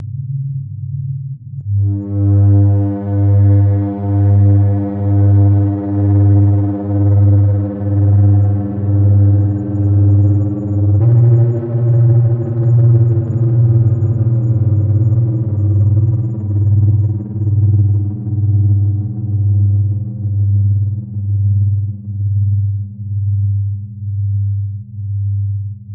Alien Engine 5
A collection of Science Fiction sounds that reflect Alien spacecraft and strange engine noises. The majority of these noises have a rise and fall to them as if taking off and landing. I hope you like these as much as I enjoyed experimenting with them.
Electronic, Mechanical, Spacecraft, Noise, Futuristic-Machines, Alien, UFO, Sci-fi, Take-off, Space, Landing, Futuristic